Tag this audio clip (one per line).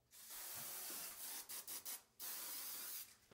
Hairspray
hair
spray